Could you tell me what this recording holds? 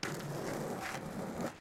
Skateboard Geraeusch IX
These field-recordings were captured for a radioplay. You can hear various moves (where possible described in german in the filename). The files are recorded in M/S-Stereophony, so you have the M-Signal on the left channel, the Side-Information on the right.
field-recording, m-s-stereophony, skateboard, sports, wheels